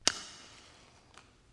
Light a match. This is extracted sound.
fire, match, noise